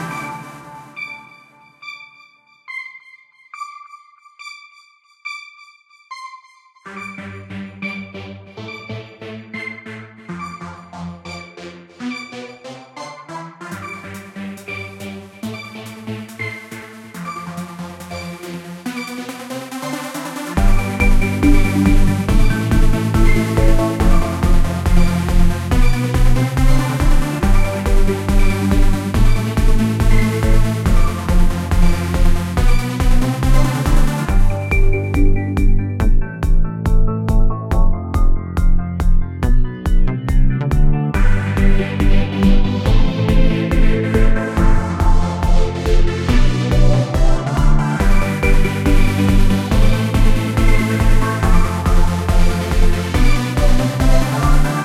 This is an intro i made for my first song. I used FL studio. This is just a first draft and it probably change a lot in te next few days. All feedback and suggestions are very much welcome.
140, bpm, intro, melody, saw, techno, trance